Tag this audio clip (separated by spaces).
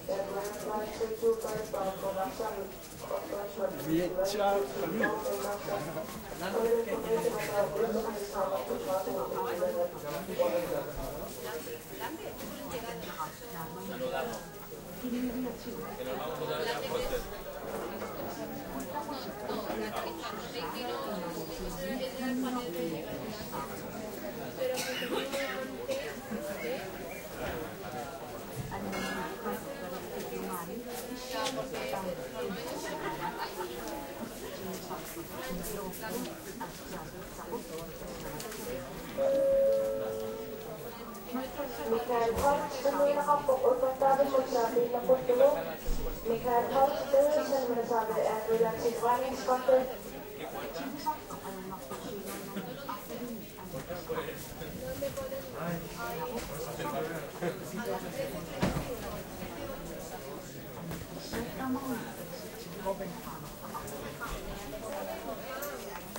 voices ambiance field-recording lounge airport